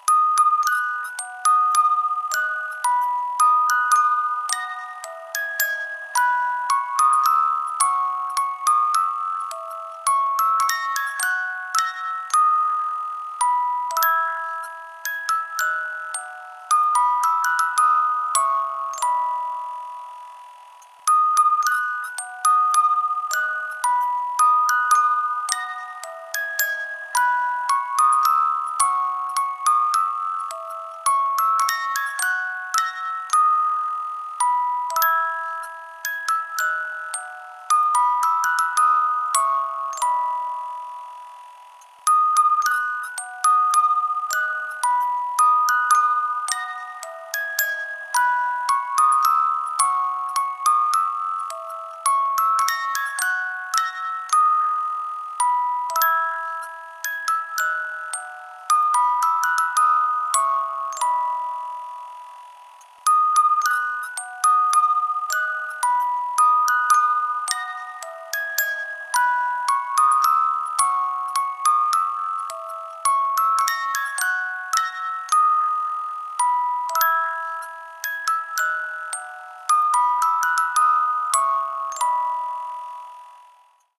Music box lullaby
Music box loop clean without any background noise.
antique, baby, box, hand-operated, historic, historical, jingle, loopable, lullaby, mechanical-instrument, melancholic, musical-box, music-box, musicbox, sound-museum, toy, wind-up